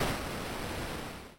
expl2-chiptone
game, arcade, chip, 8-bit, vgm, chippy, videogame, retro, chiptone, lo-fi, video-game, 8bit